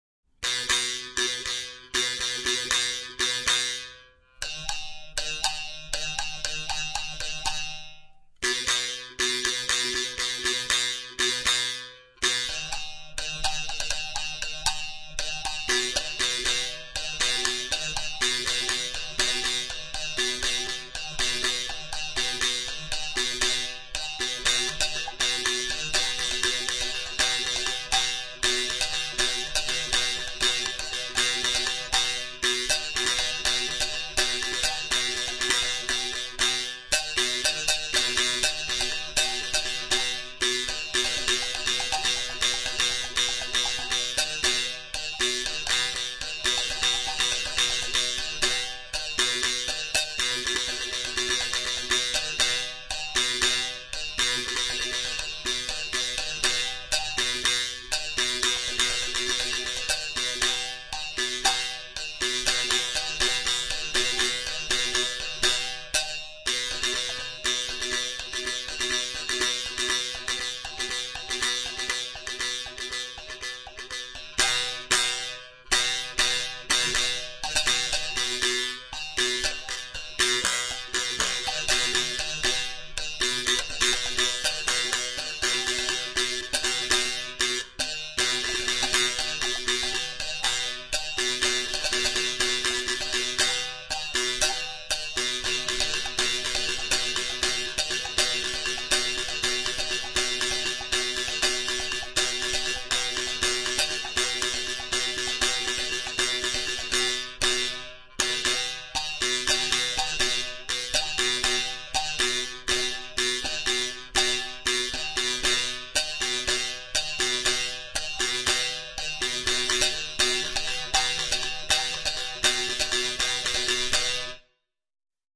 Buzz sticks impro
An improvisation on Filipino bamboo buzz sticks - freestyle - you can cut it up into several different phrases, loops, fx., etc.
percussion, bamboo, twang, buzz, buzz-sticks, groove, fx